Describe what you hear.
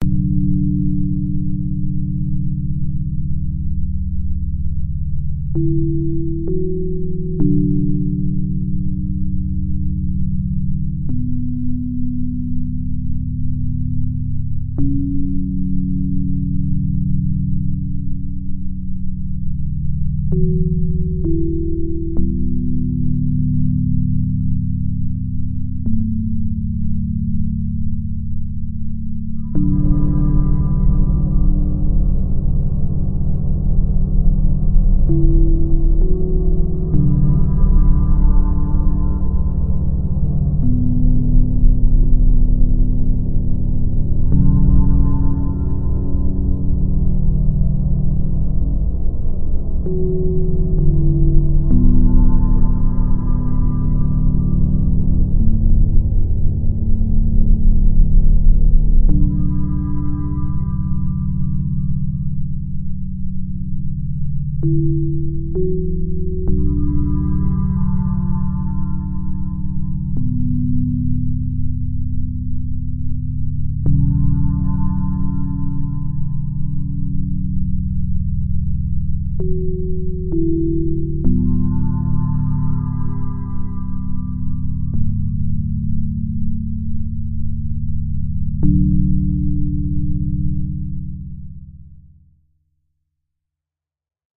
Creepy bell music #1
Amb, Ambiance, Ambience, Ambient, Atmosphere, bell, Creepy, Dark, Drone, Eerie, Environment, Fantasy, ghost, Horror, melodic, Piano, sad, Scary, Sound-Design, spirit, Spooky, Strange